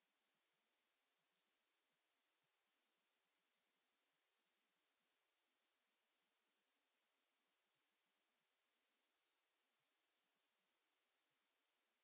you can see it looking at the spectrogram. In Audacity, for example, select the spectrum view instead of the more commonly used waveform view. To get a decent resolution the spectrogram should have a relatively narrow FFT band (1024 or above), results are best in grayscale. The original stereo audio file was produced with GNU/GPL Enscribe 0.0.4 by Jason Downer, then converted to a single channel with Audacity
enscribe, steganography, watermark